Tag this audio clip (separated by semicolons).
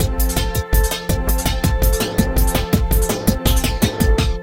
rythms; acid; electro; drumloops; processed; extreme; glitch; experimental; idm; drums; electronica; sliced; hardcore; breakbeat